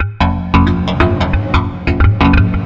Done with Redrum in Reason
reason, electronic, wavedrum, percussion, redrum